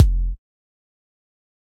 kick atomize 01
kick drum we made in fl studio on the BassDrum module. we're actually new to this module and its one of the best we've ever used to create specifically kick drums.